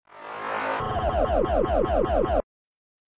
Weird Synth Sound
Processed Synth Sound
Glitch, Synth, Weird